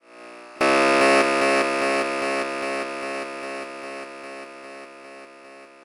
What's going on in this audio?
This is a sound effect I created using ChipTone.
emergency, horror, chiptone, signal, tension, attention, danger, taunt, sfx, effect, siren, attack, slow, warning, alarm, sound